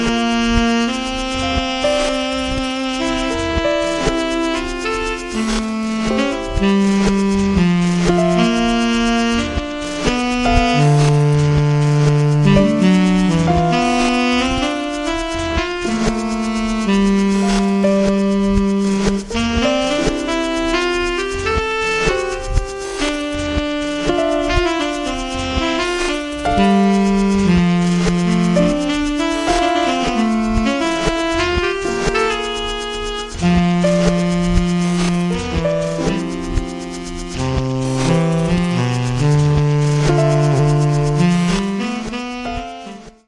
Free jazz (saxophone, piano, electronic drums)
VST saxophone with piano and strange electronic drums. Free jazz.
form, saxophone, sampled-instruments, vst, tenor-sax